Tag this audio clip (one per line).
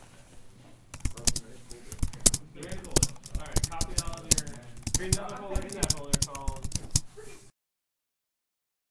Keyboard
Apple